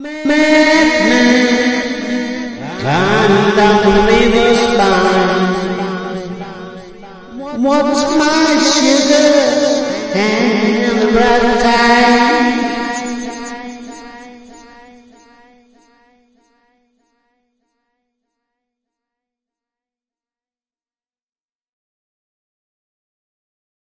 A phrase repeated and processed with ableton 9 using an SE 3300 A condenser microphone.